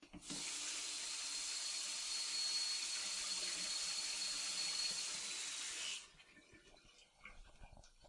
A running faucet.